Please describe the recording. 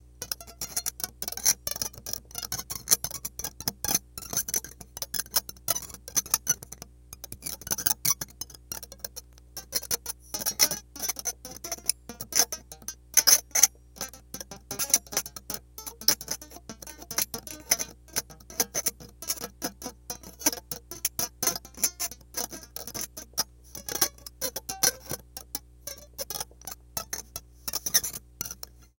Razor Blade On Acoustic Guitar - 8 of 8
[[This sound pack contains 8 sounds total, and this is # 8 of 8.]]
Use it, sell it, do anything and everything with it. I only hope it's useful for someone! However, I'd love to hear about any projects you use my sounds with!!
Abstract, creepy sounds I recorded after watching this video on Hans Zimmer's creation of the score to the original Dark Knight soundtrack:
(Fascinating video, give it a watch! :D)
- I found an old rusty razorblade (if it ain't rusty it ain't emo, amiright? amiright? Oh god that's in poor taste)
- and just started scraping it over the copper-wound strings of my Seagull S6 acoustic guitar in which I've installed an electronic pickup.
- Recorded into Reaper on my mid-2014 Macbook Pro, via direct in through a Zoom H4N in audio-interface mode.
Only processing: gentle EQ highpass at 95 hz. And some slight gain reduction.
These sounds remind me somehow of creepy film scores where the strings do that erratic pizzicato thing that sends tingles up your spine.